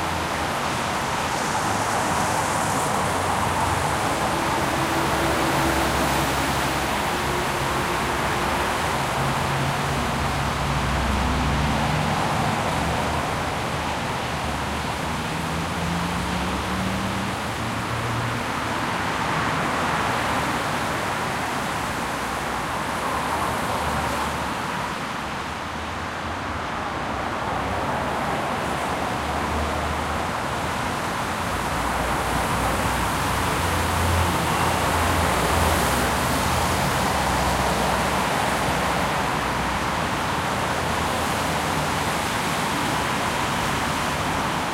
EXT CITY WET TRAFFIC
City mid traffic recorded after rain.
traffic, cars, road, after, city, rain, wet